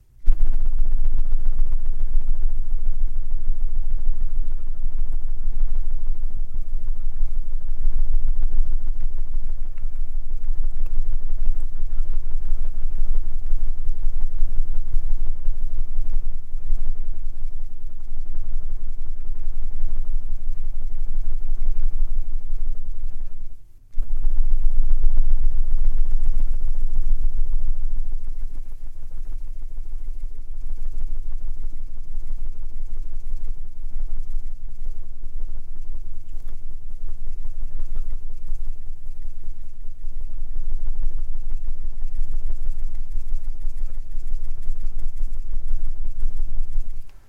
Wing Flap Flutter
I fluttered a plastic spatula in front of my TLM-103 in the studio. If you want it to sound small you'll want to filter off the low end. On the other hand, you can probably get some fun gigantic effects by using just the bass, or even pitching it down.
This was for the moth wing flutter in "Molly Moth Saves Christmas":
AudioDramaHub, bird, butterfly, flap, flutter, moth, wing